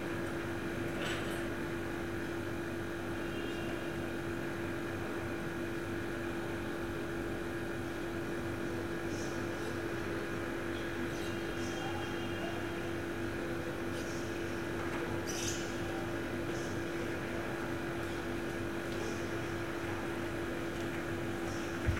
Air conditioner and the sound of people passing by/talking in the community space below. Loop-able with a bit of work.
soundscape, ambience, field, ambient, general-noise, ac, community, recording, background-sound